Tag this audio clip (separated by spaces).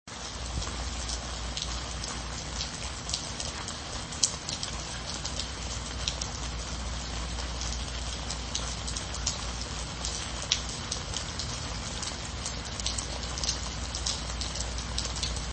rain concrete leaves field-recording